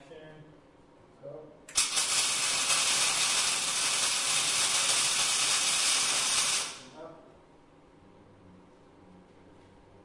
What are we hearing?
long duration mig weld